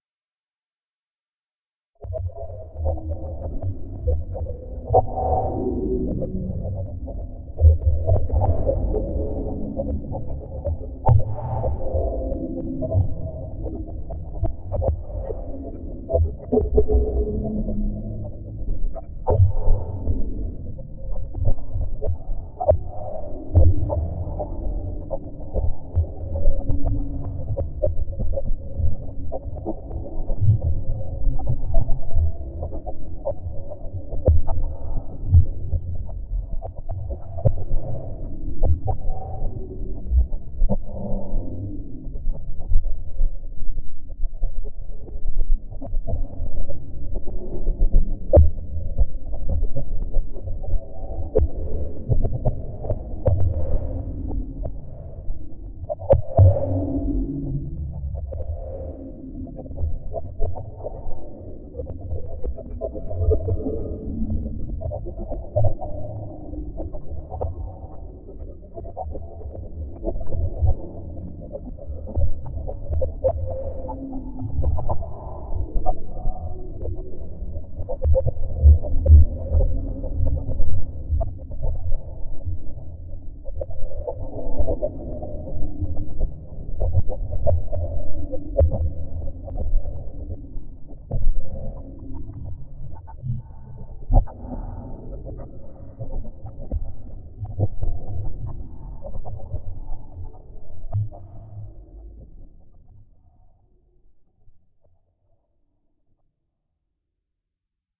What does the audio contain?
Dark Dream Ambience
Short sine-wave plucks reversed, pitched, and processed to sound unnerving.
Thank you!
reverse, sine, cave, granulator, synthetic, granular